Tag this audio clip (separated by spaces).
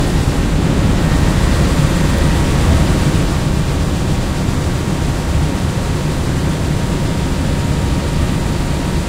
field-recording school